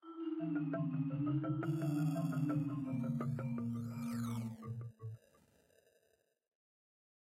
A marimba with multiple effects applied
170bpm, Warped, Marimba, Bitcrumble